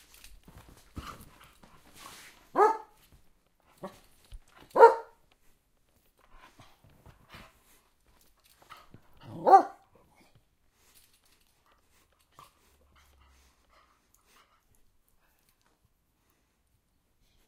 My dog barking